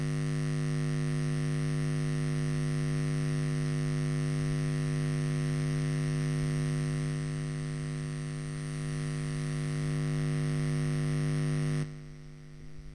Electric buzz sound, recorded with a Zoom H1.
buzz, Electric, noise, hum, electronic, interference